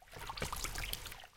medium splash3
splashing noise, with reverb